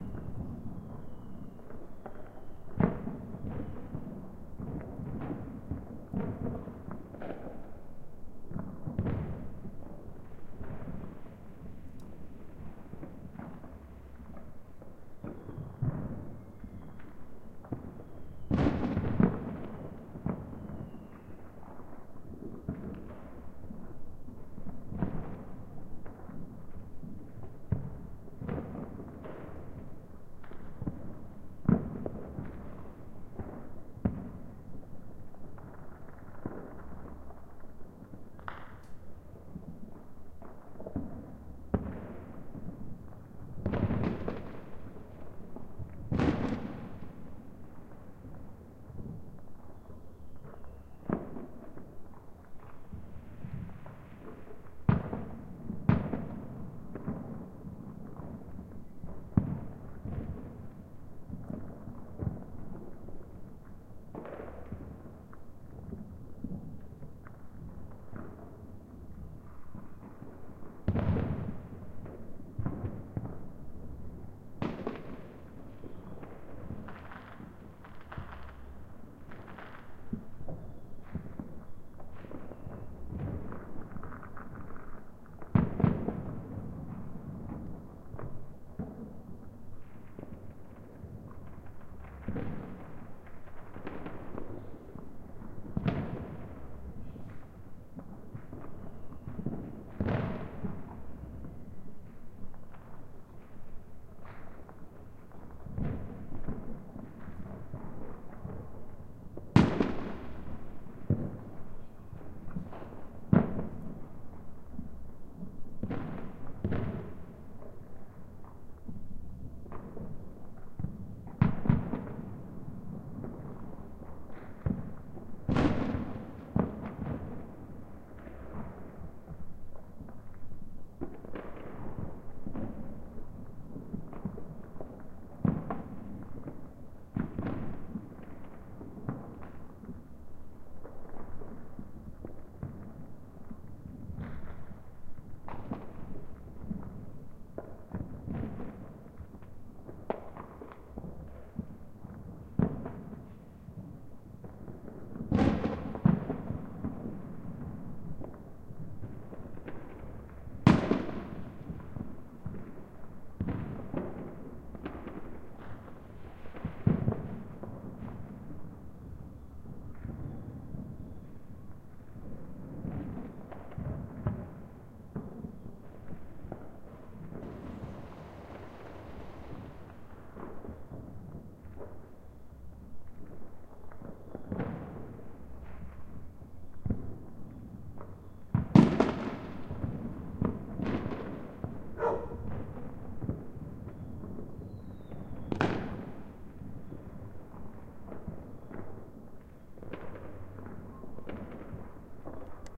New Year firecrackers 1
New year firecrackers, ambience record, first minutes 01/01/2019 MX
explosion, fireworks, firecrackers, boom, new-year